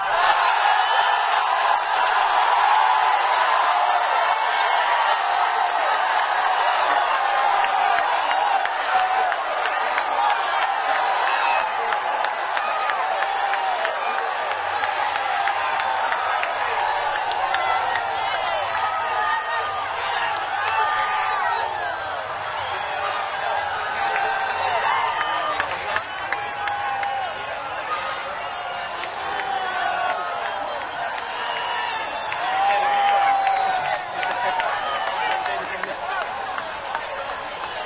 Crowd cheering football 01
Recorded at Jln. Bukit Bintang Kuala Lumpur. Watching World Cup Final 2006 Germany in the middle of street on Big Screen. Crowd cheering after Italy equalise over France 1-1. Sony MZ-NH700 Sony Sony ECM-DS30P
malaysia, stereo, crowd, people, field-recording, football, cheering, lumpur, kuala